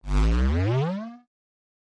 A longer synth glide.
sound-effects, fx, short, sound-effect, cartoon, comedic, cartoon-sound, synthesized, electronic, comical, synth, funny, synthesizer, humorous, silly, digital, sfx, comic